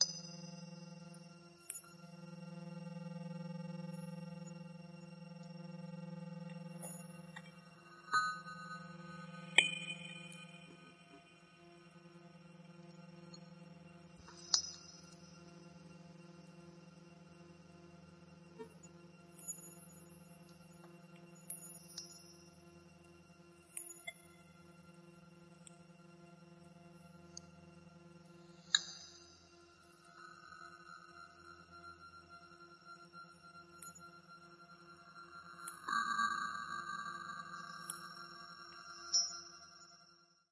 Sweet Suspense 1
A mysterious sequence of shimmering high pitched sounds. Sample generated via computer synthesis.
atmosphere, drone, gloomy, Suspense, ambient, cinematic, ambience, sic-fi, dark